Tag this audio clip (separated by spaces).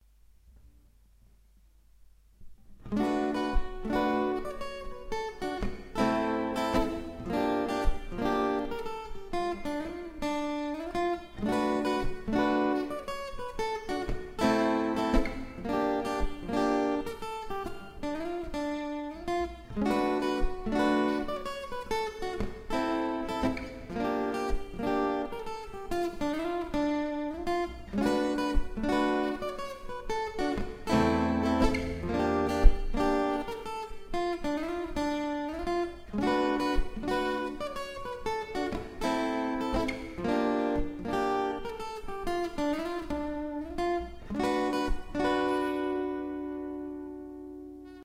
jazz guitar acoustic instrumental music experimental atmospheric improvised